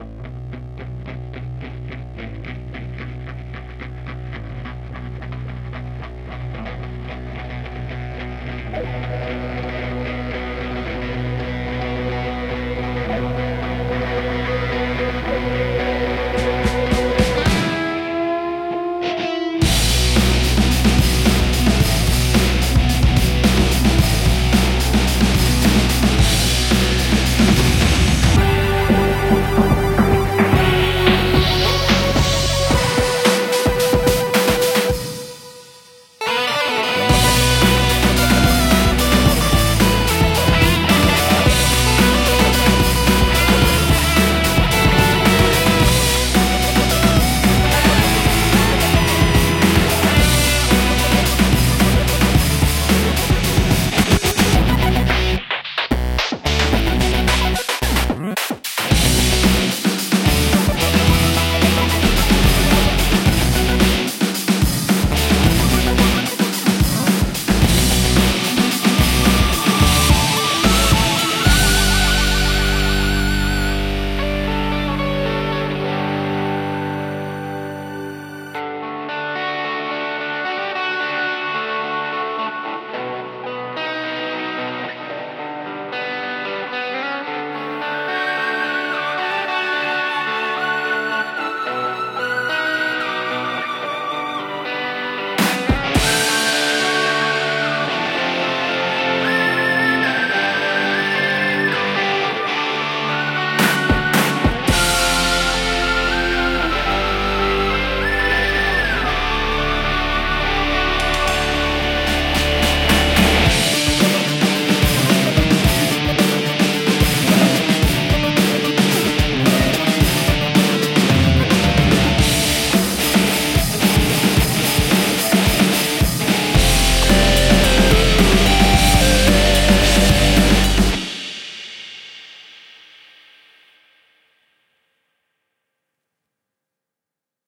Chiptuned ROck Music
Trailer music for a school project